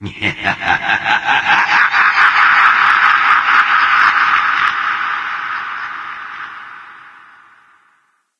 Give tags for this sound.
clowny; ghoul; laugh

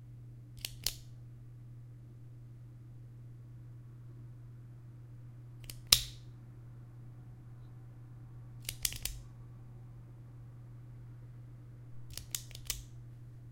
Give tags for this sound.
foley; pen; clicking; clickytop; office-sounds; office

